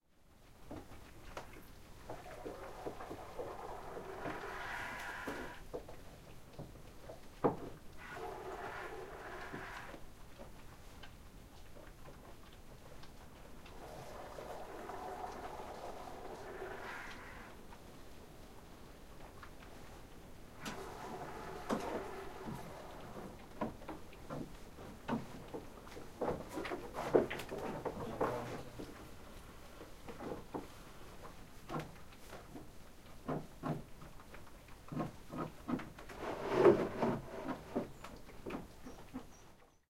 goats milking in plastic bucket 4 slow rythm
Farmer milking a goat by hand. Bucket is half-full of milk. Goats and bucket stands on a wooden platform.
plein, plastic, traire, vre, milk, bucket, ch, plastique, full, traite, seau, Milking, lait, Goat